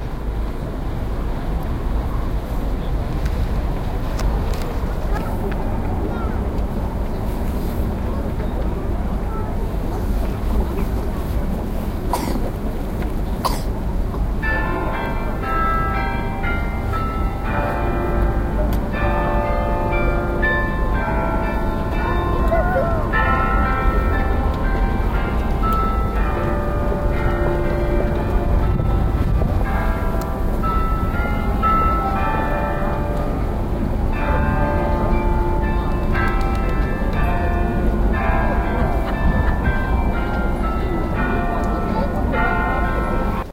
maastricht vrijthof noisy
Variation 2) Walking through the city of Maastricht. This was outside of the center, so it's not that crowded, you can even hear some birds. Still there's a bit of traffic driving around.
Recorded with Edirol R-1 & Sennheiser ME66.